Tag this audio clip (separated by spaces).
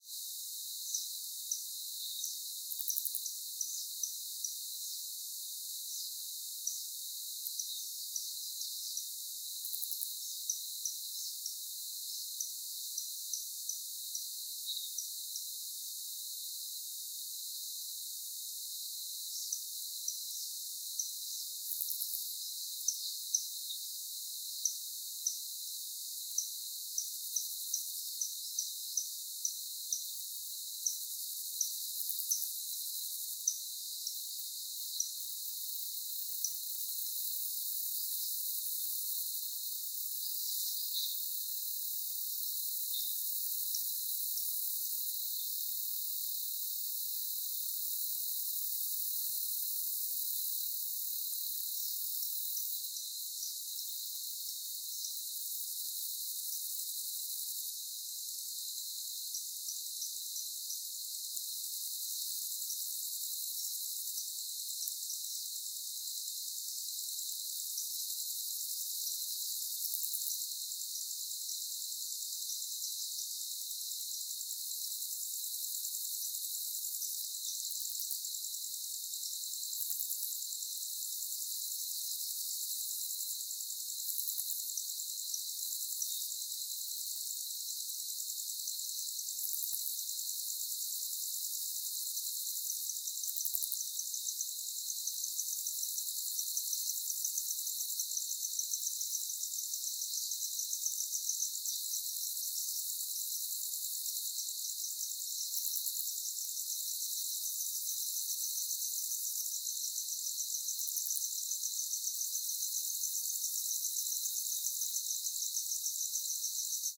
ambience clean crickets day field-recording forest high-frequency insects jungle nature stereo